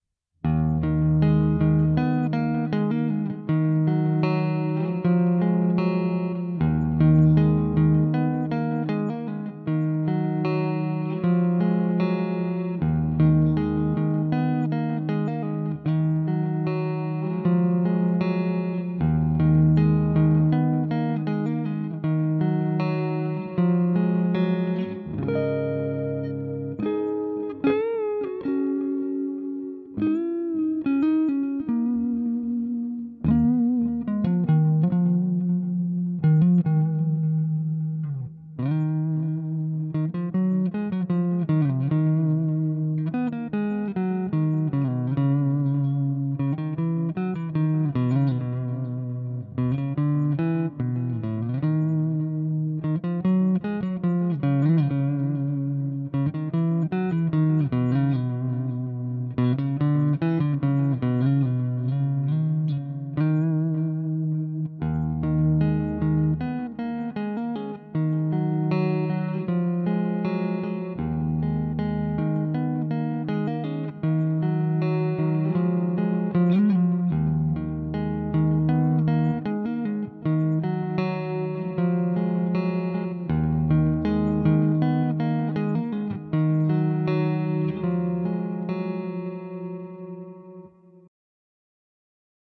Why Moody D Sharp
electric guitar tones clean, sorta moody, maybe a bit spooky
ambient, celestial, eerie, electric, guitar, moody, spacial, spooky